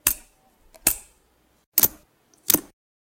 0014 Hardware Flick
Recordings of the Alexander Wang luxury handbag called the Rocco. Hardware flick